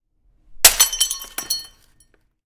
glass bottle shattering